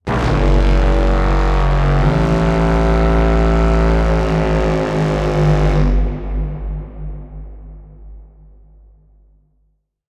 A heavily processed didgeridoo note to imitate the large, terrifying horn of the alien tripods from "War of the Worlds". Processed using Soundtoys plug-ins and Kontakt.
An example of how you might credit is by putting this in the description/credits:
The sound was recorded using a "Zoom H6 (XY) recorder" and edited using Kontakt and Cubase on 2nd January 2019.